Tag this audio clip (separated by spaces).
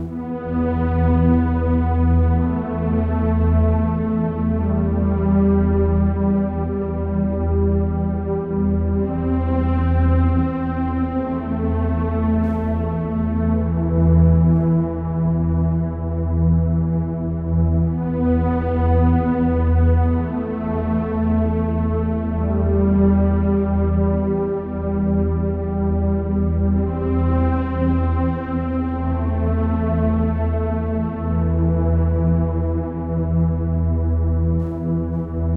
ableton distant loop pattern string